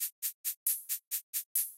16th Shakers
These sounds are samples taken from our 'Music Based on Final Fantasy' album which will be released on 25th April 2017.
Music-Based-on-Final-Fantasy, Percussion, Sample, 16th, drums, Shakers